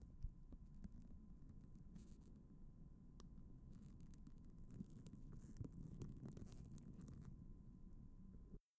Recorded setting a piece of plastic on fire, boosted the low end. Sounds like it could be distant fire or wind.